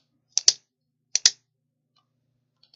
Clicking a pen
click, pen, stationery, writing